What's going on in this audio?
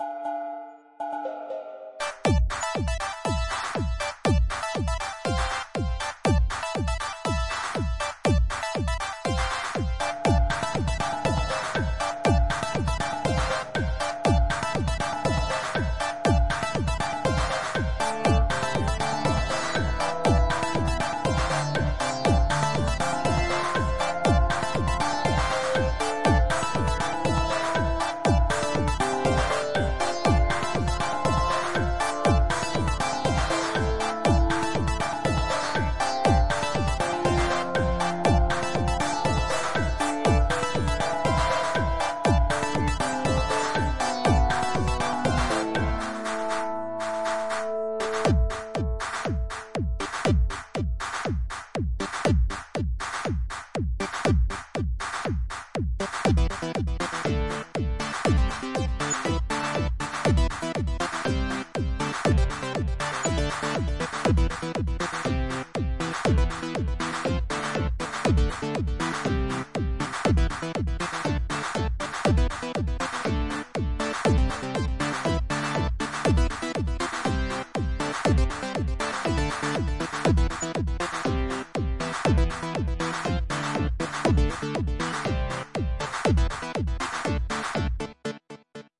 Thử Nghiệm Điện Âm 2
Different version use notes from Thử Nghiệm Điện Âm 1. Create use GarageBand with Remix Tool and World Music Jam Pack. Main instrument is Moonbeam synth. Start create 2008.10.02, finish 2018.04.10 (almost 10 years!)
electro, rhythm